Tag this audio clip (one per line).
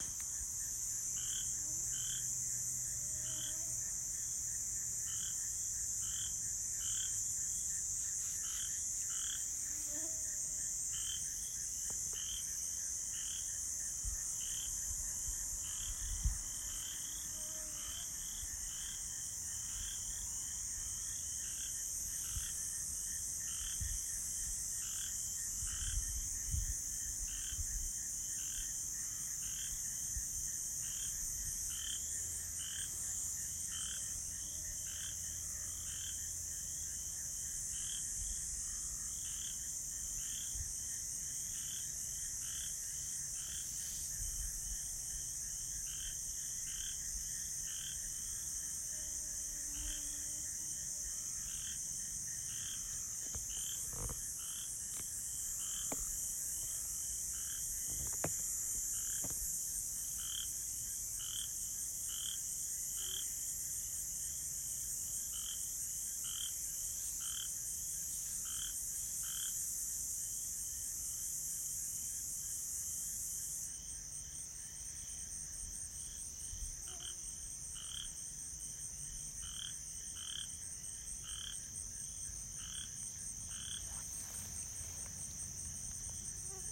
swamp
frogs
bugs
mosquitoes
night
crickets
marsh